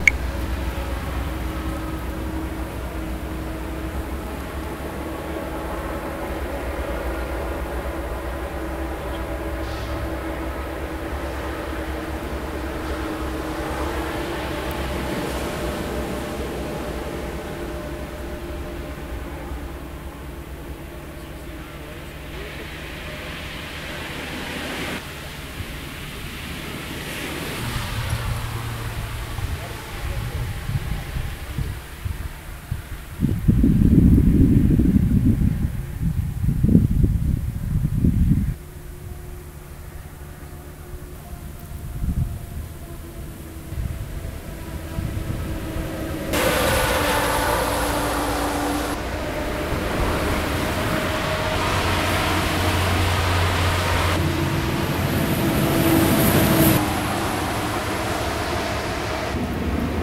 Rodovia 262 Minas Gerais
Posto de paragem de ônibus, carretas e caminhões.
** Acidentalmente deixei o AGC (Automatic gain control) ativado. É possível perceber pequenas variações de volume no waveform. Sorry!
Gravado com celular Samsung Galaxy usando o App "Tape Machine Lite".
(Recorded with Samsung Galaxy using "Tape Machine" App for Android)
16 bit
Mono